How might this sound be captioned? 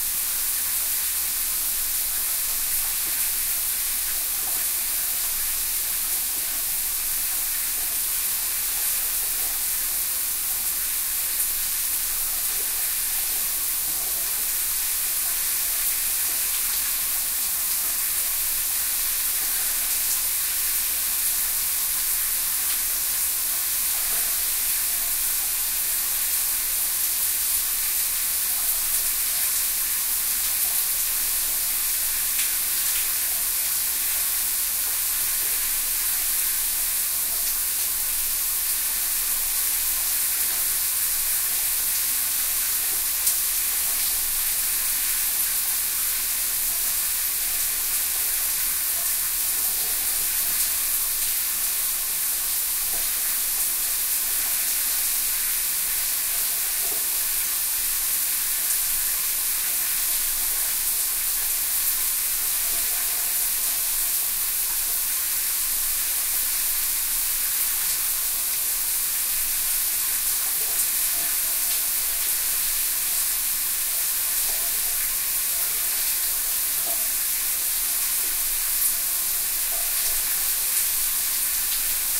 Shower Water
Field recording of water going down my shower drain.
drain, water, shower, field-recording